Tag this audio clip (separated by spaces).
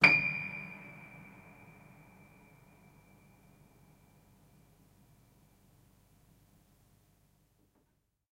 old sustain string pedal piano horror detuned